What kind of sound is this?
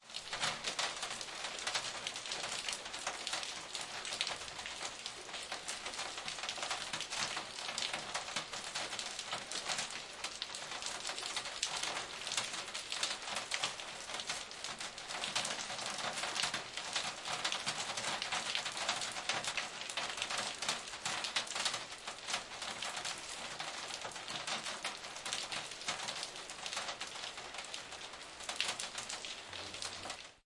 Rain on the roof
I recorded this sound with a zoom H6 put under a house roof
rain, roof, weather